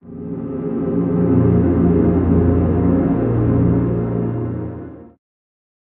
Pad from deep space. Sounds like a very dramatic drone.
Very large sound.
You can improve the "unnatural" release of this sample by using a reverb.
3 transpositions available.